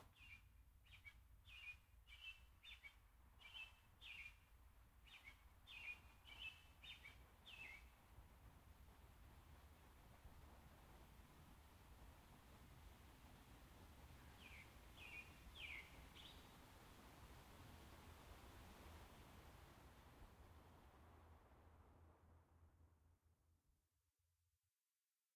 Suburban Bird
One bird singing in a suburban park with soft, distant traffic noise.
Recorded with an H4n recorder and Shure SM63LB omnidirectional mic.
Park, city, suburban, birds, ambient, ambiance, field-recording